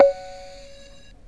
Old realistic concertmate soundbanks. Mic recorded. The filename designates the sound number on the actual keyboard.